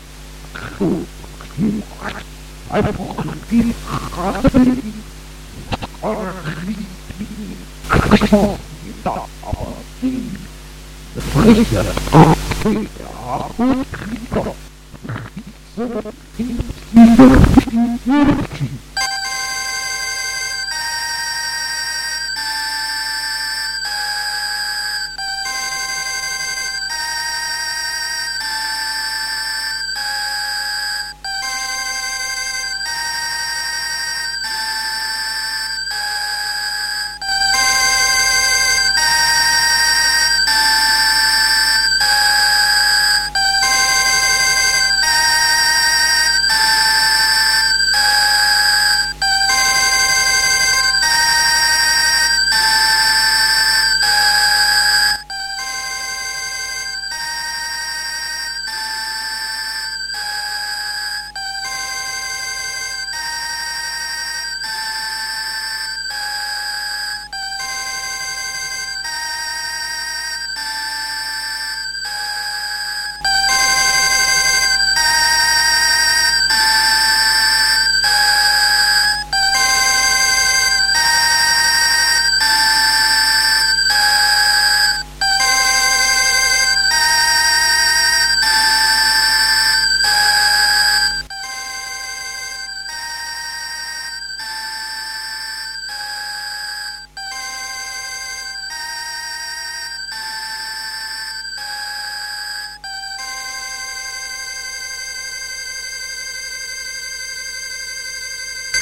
Another pause signal downloaded by 'the Seal' Boise/ID. There is need of a simultaneous intergalactic voice translator.
galaxy, news, times, SPACE, spaceship, broadcasting